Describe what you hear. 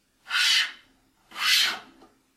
Metal on Metal sliding movement